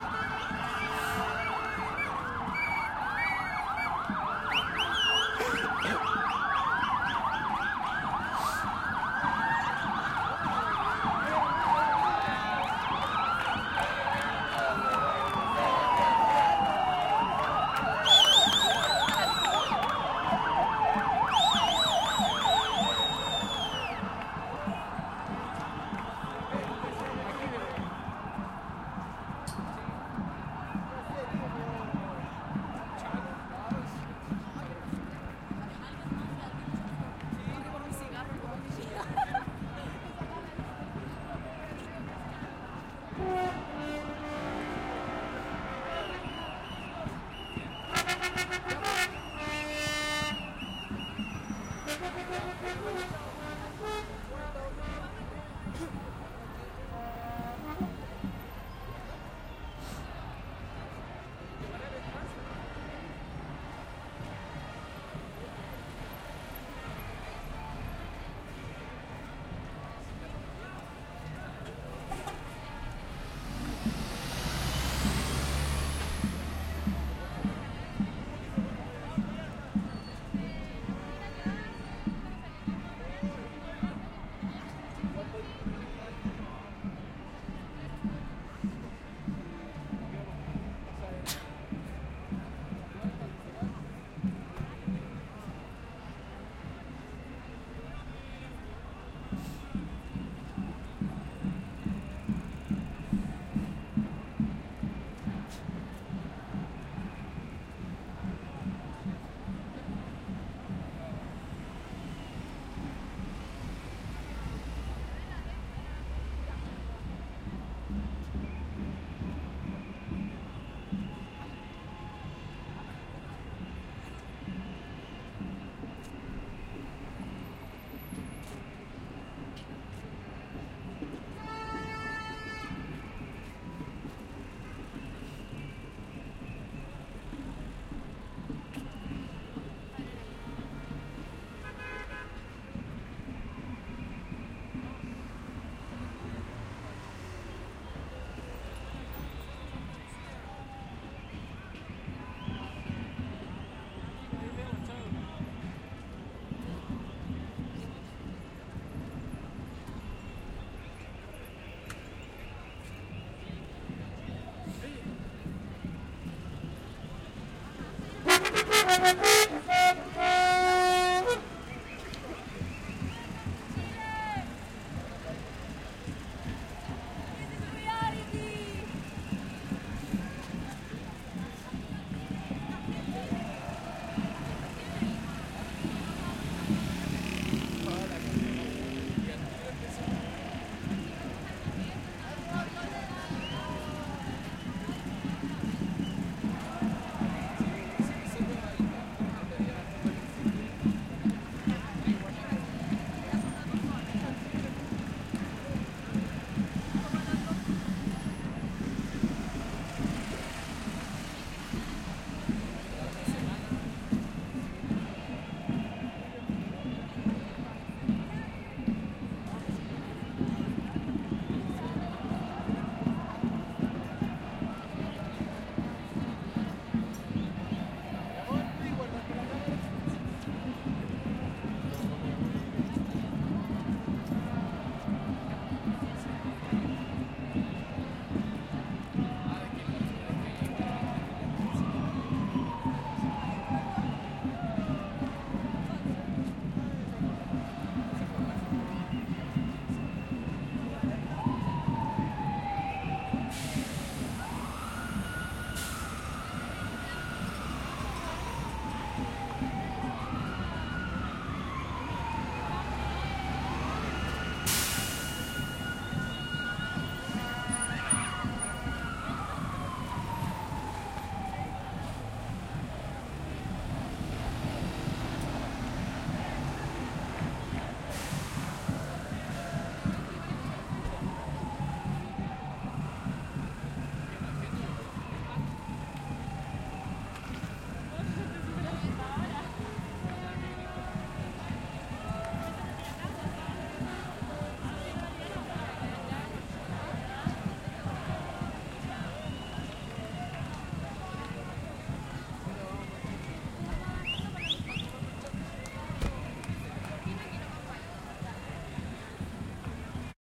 Marcha estudiantil 14 julio - 11 desde lejos las sirenas
Múltiples sirenas
marchan desde lejos.
Comienza el tráfico de autos y micros
autos, bus, calle, cars, chile, crowd, educacion, exterior, gente, lejos, marcha, micros, nacional, paro, people, protest, protesta, santiago, sirenas, street, strike